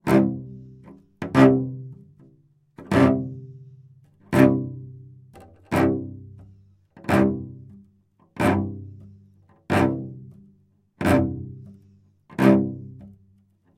Concrete Cello 09 Arco Slams 02
The "Concrete Cello" pack is a collection of scraping, scratchy and droning improvisations on the cello focussing on the creation of sounds to be used as base materials for future compositions.
They were originally recorded in 2019 to be used in as sound design elements for the documentary "Hotel Regina" by director Matthias Berger for which I composed the music. Part of the impetus of this sampling session was to create cello sounds that would be remiscent of construction machines.
You can listen to the score here :
These are the close-micced mono raw studio recordings.
Neumann U87 into a WA273 and a RME Ufx
Recorded by Barbara Samla at Studio Aktis in France
imitation, instrument, sound, Cello, score, ponticello, string, object, bow, film, concrete, motor, design, sul, mono, raw, quartet, scrape, objet-sonore